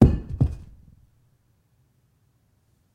Brick fall on carpet -3db
A delightful THUD as this brick drops onto the carpet and flips onto its side. Audio raw and unprocessed.
brickle, brick, bricks